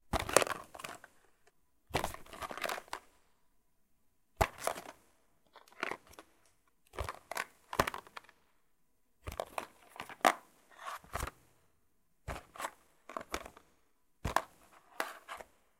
Pick up small carton box with items inside
Several variations of picking up an item (little paper box with stuff inside), recorded with Zoom H4n